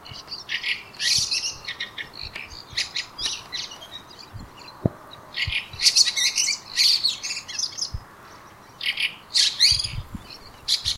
Birdsong recorded early morning in Sweden, ~03:30, urban area. Processed with slight EQ and noise reduction.